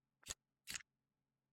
elevator button 1b
The sound of a typical elevator button being pressed. Recorded at an apartment building in Caloundra using the Zoom H6 XY module.